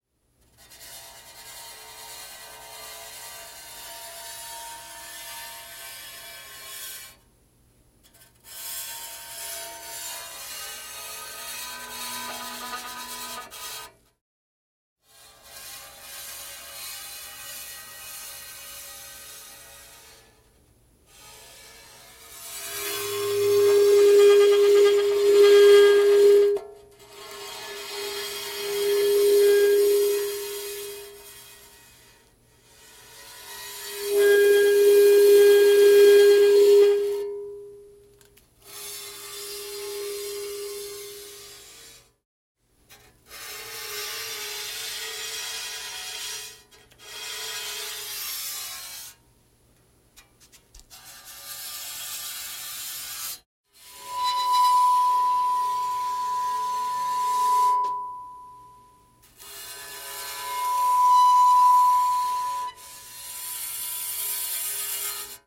bowed saw
Using a violin bow on the back of a saw.